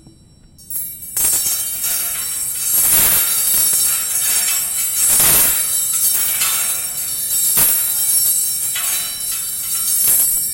Clanging of some metal coat hangers.
clang, coathanger, metal, metallic, percussion